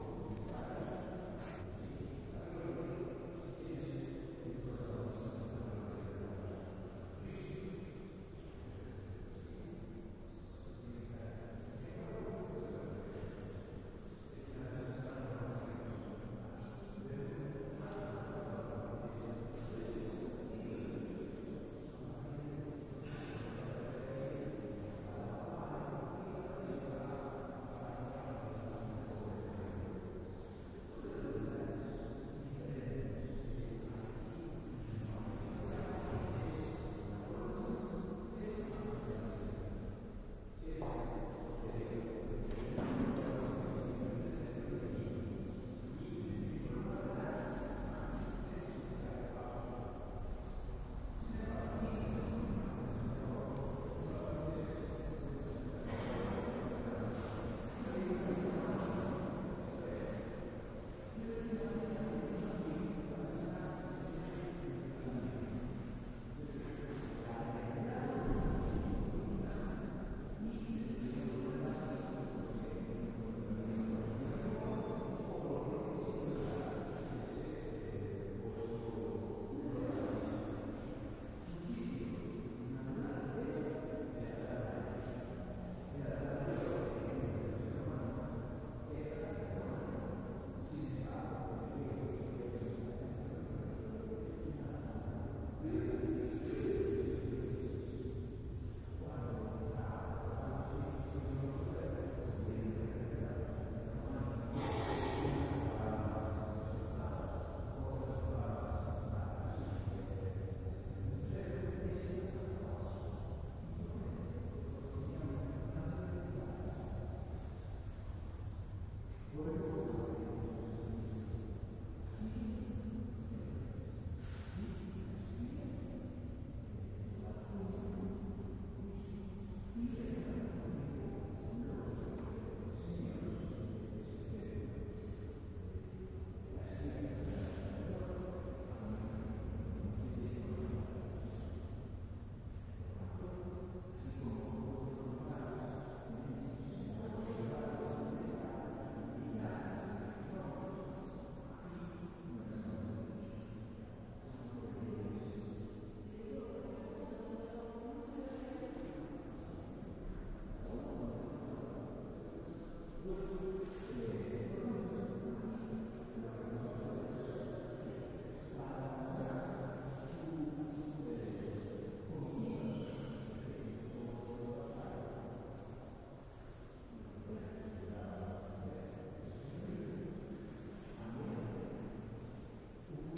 20120715 resonant.hall.04
distant voices talking in Spanish inside a large hall. Recorded inside Catedral de Ourense (Ourense, NW Spain) using PCM-M10 recorder internal mics
church, echo, field-recording, ourense, Spain, spanish, voices